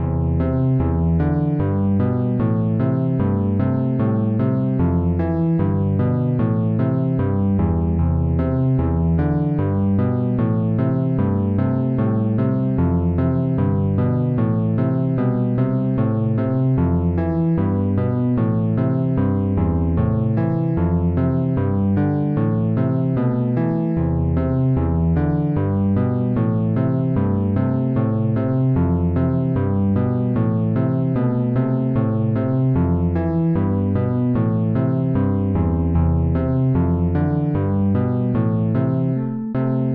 Useful for old retro games in castle like area or shops.
Thank you for the effort.